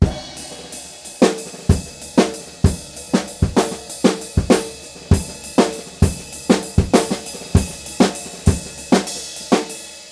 Drums Funk Groove 7 Ride
Funky, groovy drum beat I recorded at home. Ride cymbal is used.
Recorded with Presonus Firebox & Samson C01.